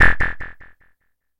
sounds like a phaser noise, or something an alien would use.